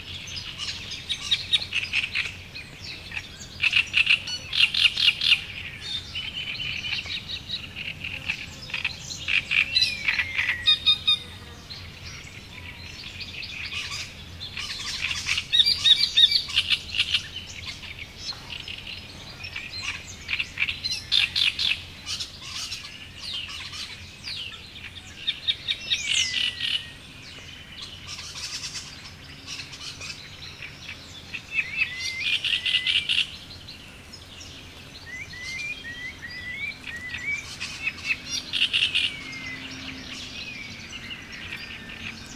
20060426.bolin.day.01
mixture of various bird species singing near a pond. Great Reed Warbler calls stand out / multiples cantos de pájaros cerca de una laguna
birds, field-recording, insects, marshes, nature